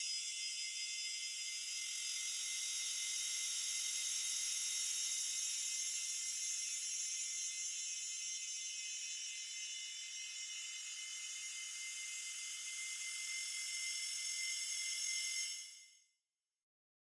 Content warning
The sound was made in Alchemy Synth.
grinder, grinding, metal